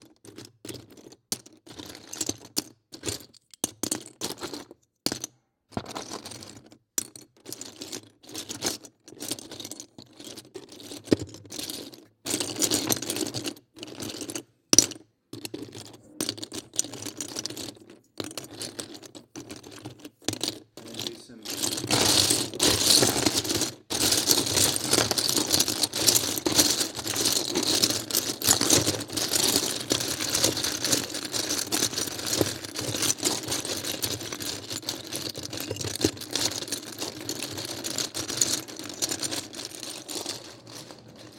Big box of lego bricks being mixed around by hand. Microphone: Rode NTG-2. Recording device: Zoom H6.
toys-noise, clicking, play, clack, noise, clicks, sound, click, toys, legos